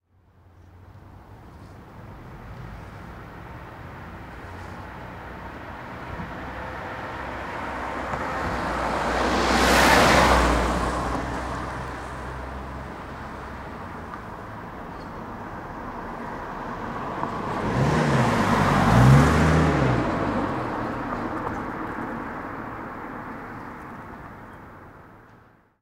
Car by slow Civic and Volvo DonFX
car by pass
by, pass, car